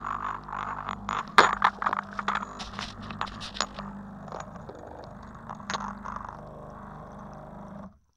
ExpressoCoffee mono 01
Expresso cups rattling against each other in a coffee machine.
Recorded with homemade contact mic attached to one of the cups with sticky tape.
Zoom H1 recorder.